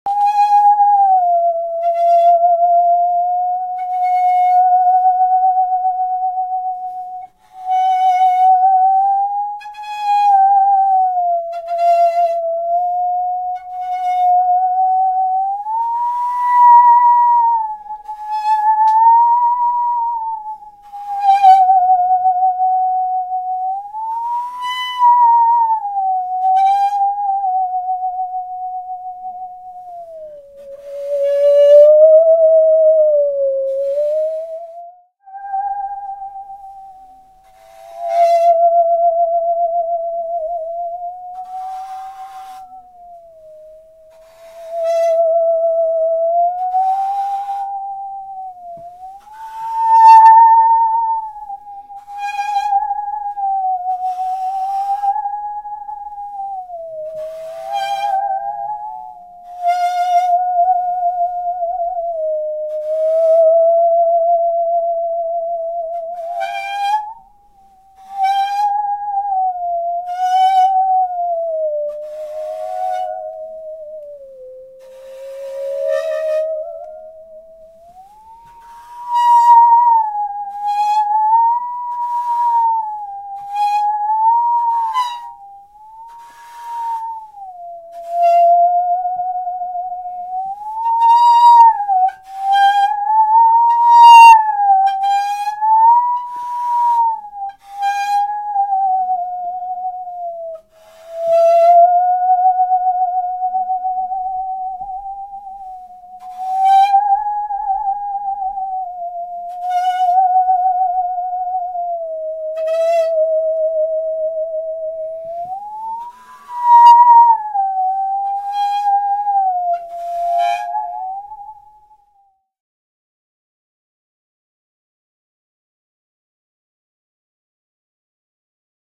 Jules' Musical Saw no voices
My old friend Jules Lawrence popped round to provide the voice for Poniros the evil ringmaster in 'Dream Girl' Episode 4 of Ad Astral podcast, and also brought his musical saw for some spooky sound effects as a backdrop to a freakshow. This version has had our voices edited out.